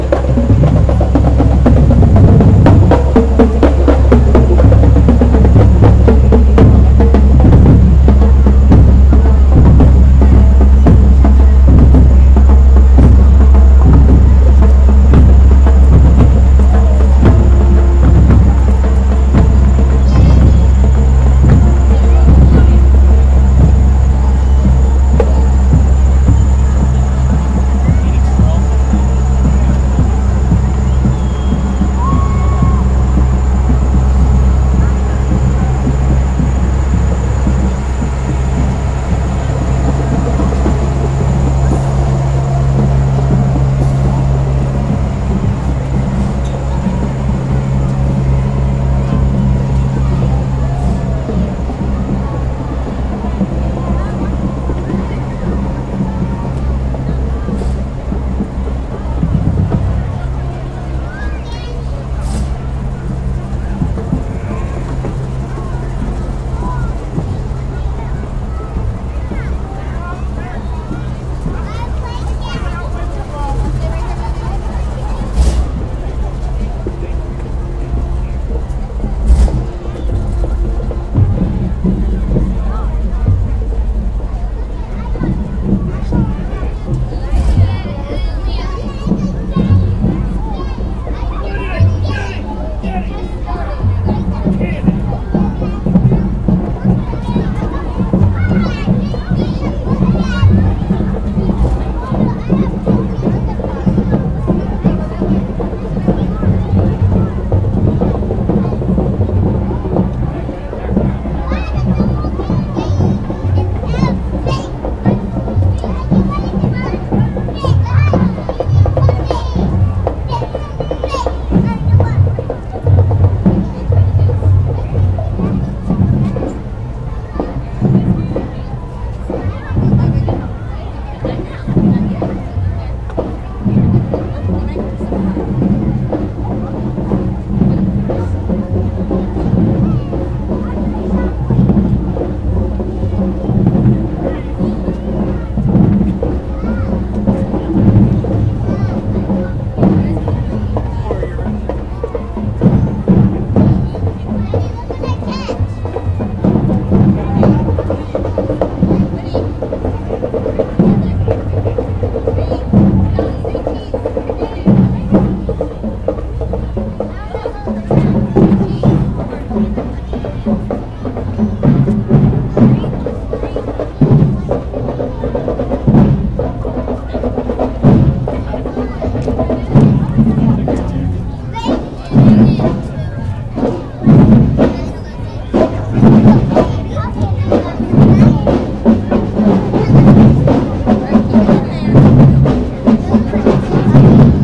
I'm at the corner of 5th and Kansas not far from KAIR 93.7FM studios. This sound starts with the band from Part1 departing and a truck passing. Children talk and yell and a man yells something. Another band approaches. A woman seems to tell someone to say cheese in the distance. The file ends when the band gets a bit too loud for my microphone. Recorded with Goldwave with an Asus laptop in my backpack and a Microsoft Lifecam3000 poking out of it facing behind me clothes-pinned to one of the handles of the pack. Unfortunately Microsoft no longer supports their Lifecam apps so it's not possible to turn down the internal mic gain.